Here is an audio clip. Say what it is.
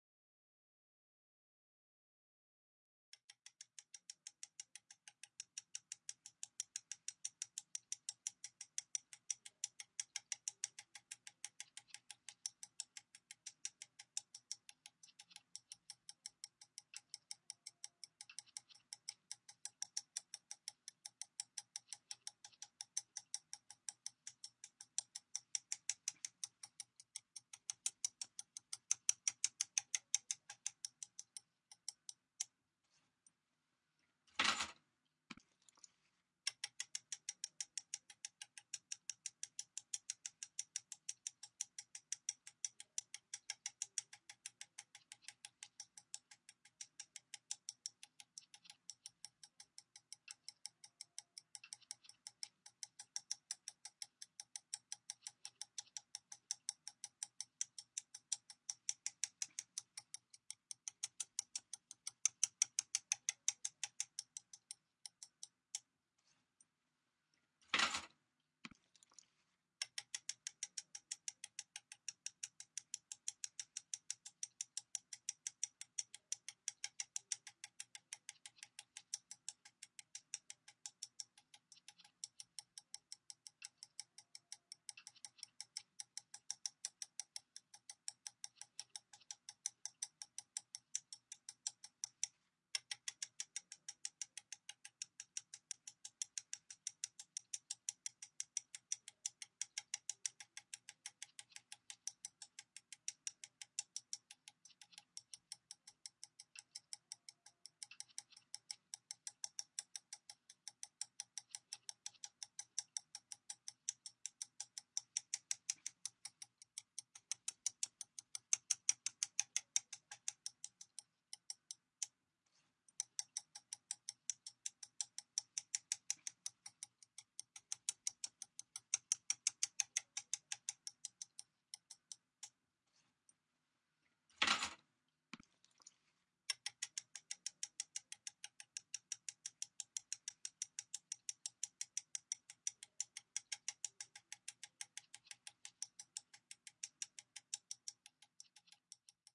Repeated key tapping on a desk recorded with a MacBook Pro.